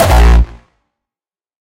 a Kick I made like a year ago. It has been used in various tracks by various people.